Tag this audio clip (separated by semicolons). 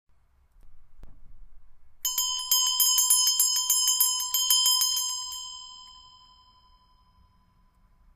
Bell; ring